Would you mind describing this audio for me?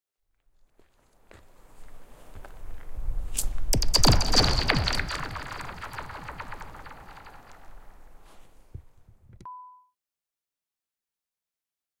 Thrown a rock at a frozen lake in the middle of wintery Alaska.
northern lights were lit.